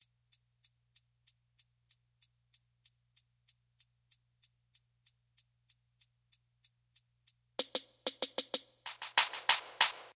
Hi Hat and Claps 95 bpm
clapping, moderate